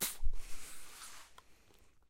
Percussive sounds made with a balloon.
acoustic, balloon, percussion, rubber